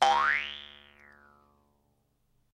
jaw harp13
Jaw harp sound
Recorded using an SM58, Tascam US-1641 and Logic Pro